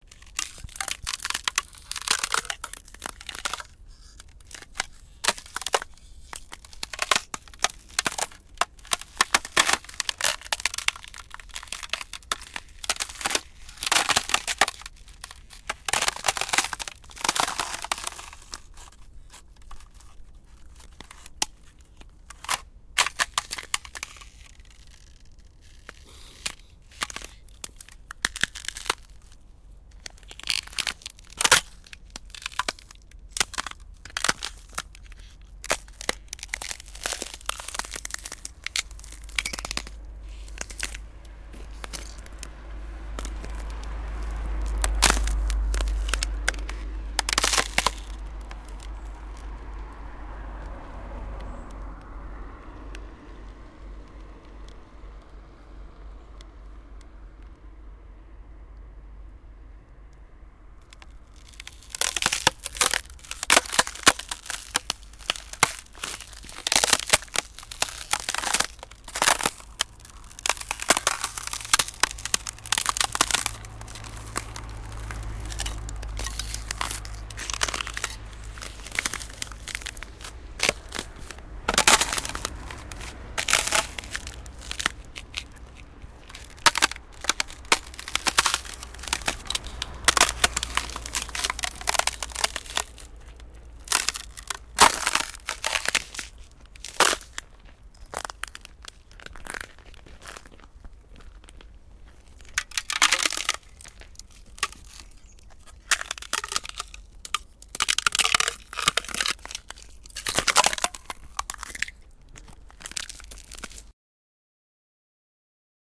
Breaking a sheet of ice underfoot. Recorded using a pair of Soundman OKM microphones (dangled) and into a Sony PCM D50.
cracking, frozen, crack, ice